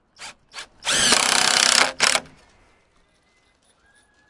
Drilling a screw through wood with an impact drill